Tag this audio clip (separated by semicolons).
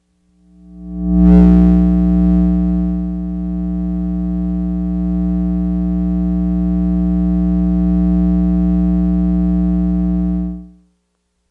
hum; noise; radiation; intereference; electromagnetic